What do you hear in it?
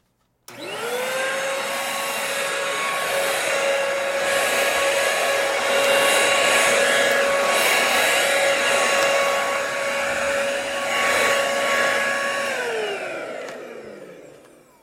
vacuuming the floor
sound, class, intermediate